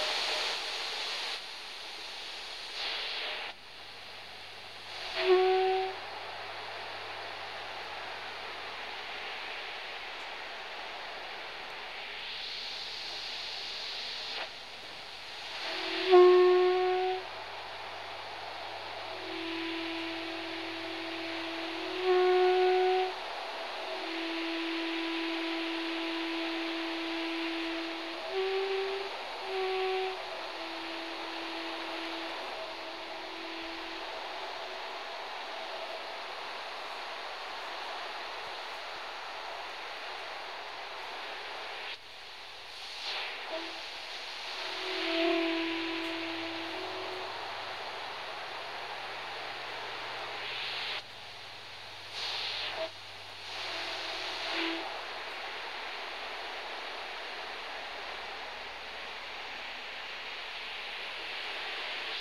Shortwave radio static & tones
Scrolling through various shortwave frequencies, caught couple tones among the noise. Sounded like sad horns.
Radio used was a Grundig Yacht Boy 207 with a broken antenna, recorded on a Tascam DR-05x.
am, am-radio, electronic, frequency, interference, noise, radio, radio-static, shortwave, shortwave-radio, static, tone